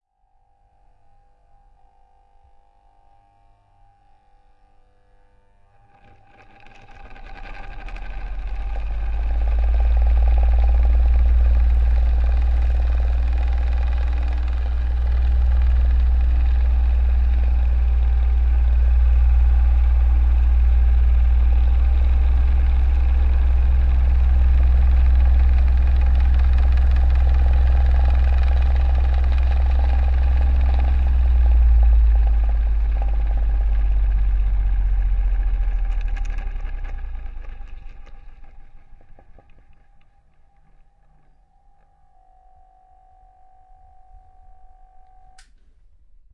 FAN 5 (POWER)
Record from behind, empowered with force of dusty blades.
motor, fan, old, airflow